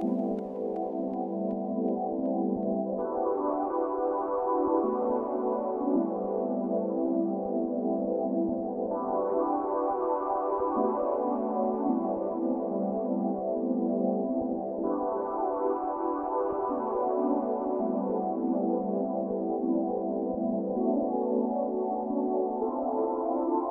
jazzy groove (consolidated)
Jazzy pad with long chords